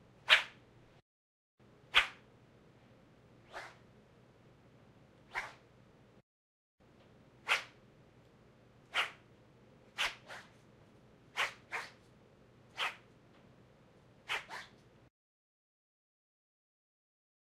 thin reeds of bamboo, swished to make sound of punch before landing, sword swing etc.
Recorded with a Zoom H24n.
bamboo, before, punch, slice, strike, swing, swish, swoosh, sword, sword-slash, woosh